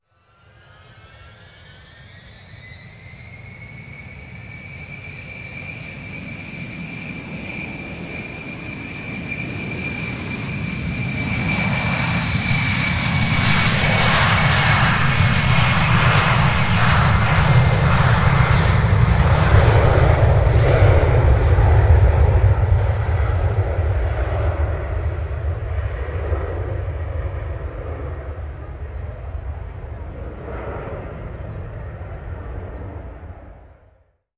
The track was recorded at one of the last visits of a soviet Tupolev TU-154M to Prague-Ruzyne airport. The plane was owned by Slovakia Government at that time. By Dec 31, 2017 the aircraft, which was the last active Tupolev TU-154 in EU at all, has been retired. After that the plane was not wrecked luckily, but went to aviation museum of Kosice, Slovakia :-) For recording I used a Canon EOS 6D with a mounted Sennheiser MK-400 (mono) mic.